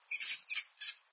Squeak of an agitated rat
Original sound: "Mouse Squeaks" by Shyguy014, cc-0
mouse, rat, rusty, squeak, squeaky